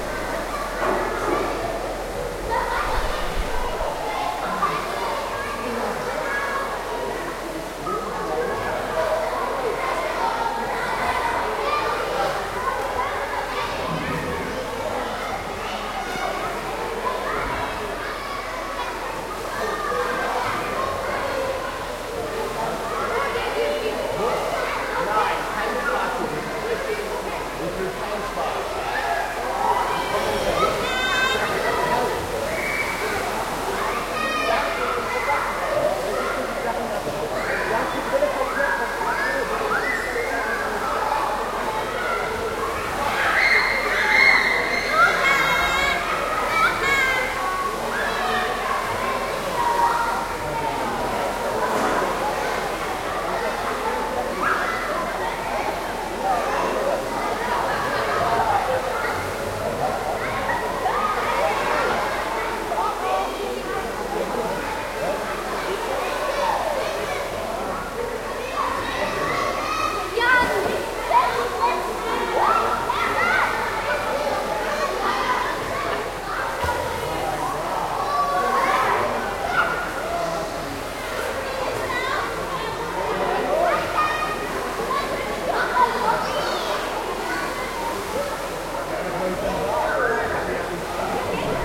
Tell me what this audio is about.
At the Swim Center, Natatorium, Berlin
Im Schwimmbad, Hallenbad, Berlin
Dans une piscine intérieure, Berlin
In una piscina coperta, Berlino
En una piscina cubertina, Berlín
family, water, pool, swimming, center, Berlin, swim, natatorium